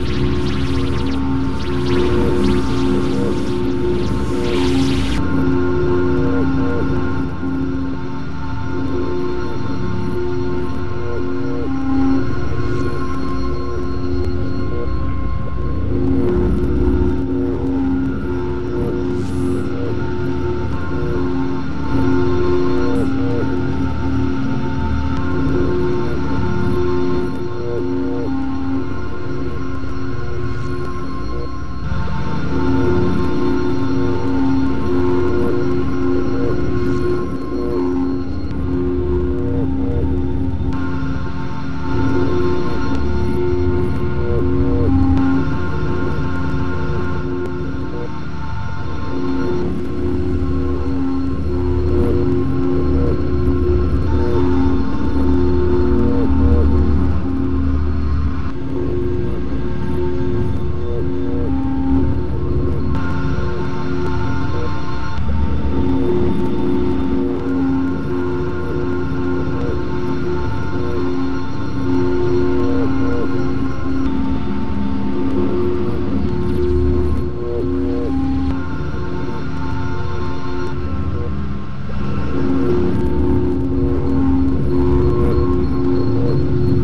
Weird drone with glitches and other things going on to make it more interesting.